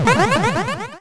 Retro video game sfx - Laser 4
Echoing laser shot
atari, chip, chipsound, game, labchirp, lo-fi, retro